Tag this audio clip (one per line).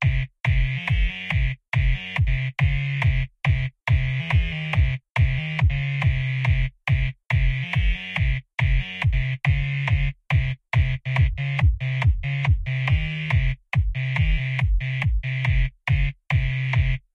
fruityloops
beat